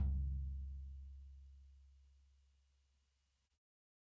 Dirty Tony's Tom 16'' 025
This is the Dirty Tony's Tom 16''. He recorded it at Johnny's studio, the only studio with a hole in the wall! It has been recorded with four mics, and this is the mix of all!
drum, 16, realistic, dirty, punk, tonys, drumset, real, kit, set, tom, raw, pack